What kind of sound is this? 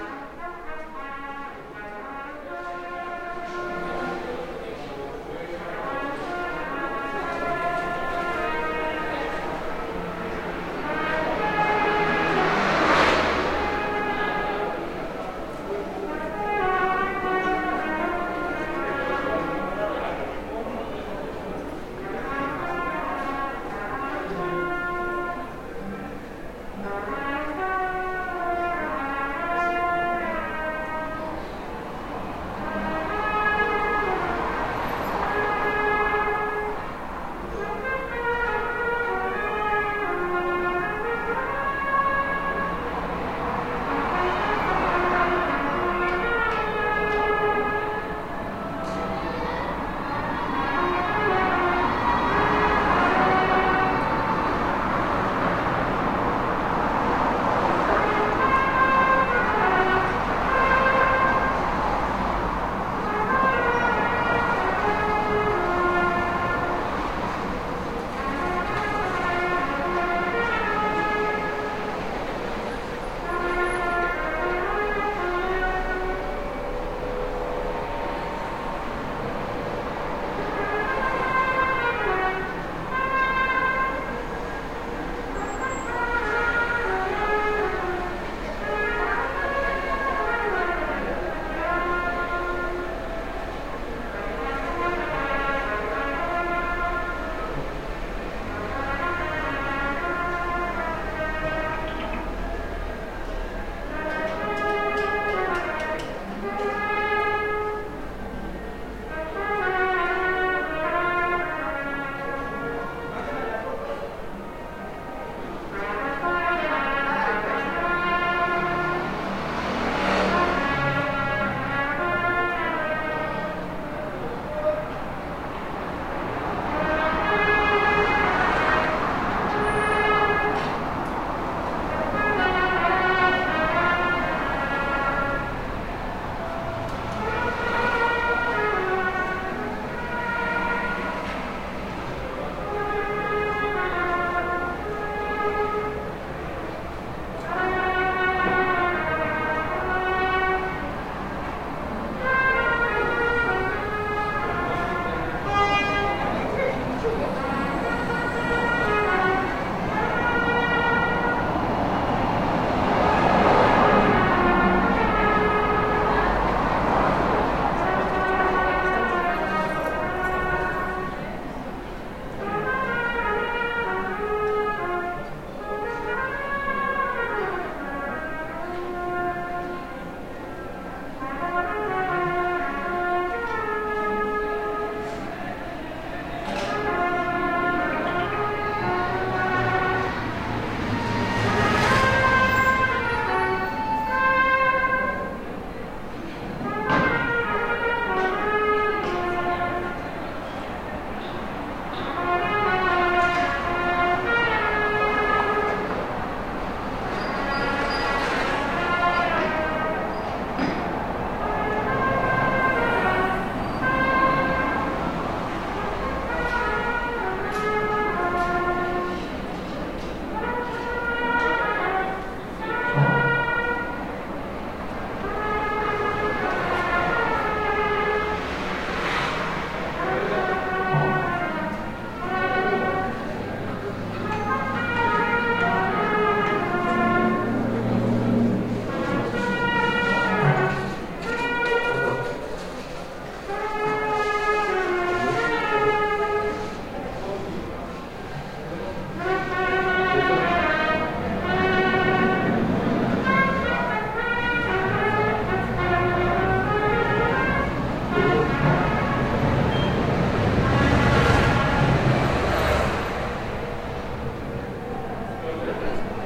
trumpete being played by a musician at mexicos city downtown
musico en la calle del centro de la ciudad de mexico tocando trompeta,
general-noise, ambiance, ambient, atmosphere, soundscape, traffic, ambience, field-recording, cars, city, mexico, noise, people, street